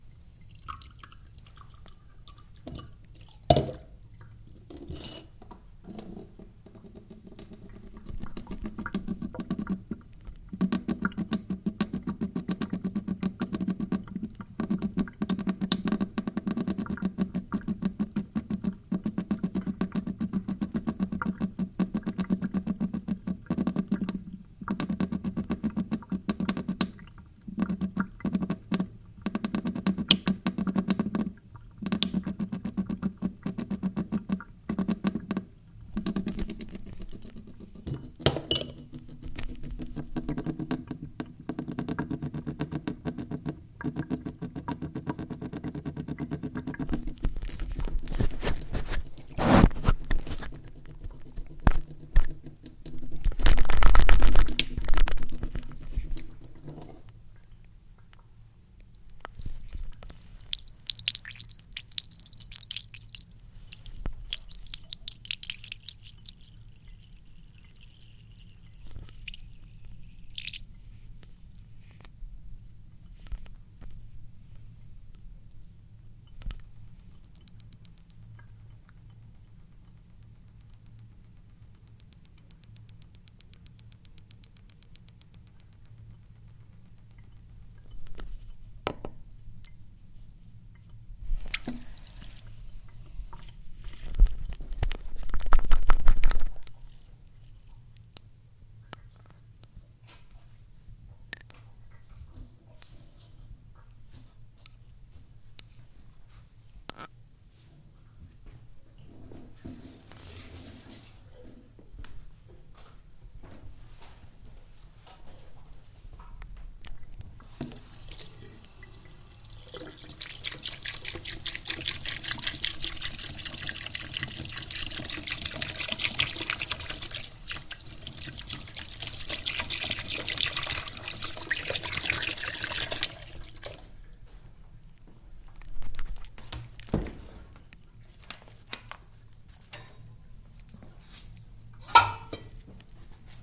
Sink Water Drips Various
Various speeds of water dripping from the tap into the sink.
Recorded with Sony TCD D10 PRO II & Sennheiser MD21U.
drip, water, metallic, tapping, watery, dripping, sink, drips, wet, tap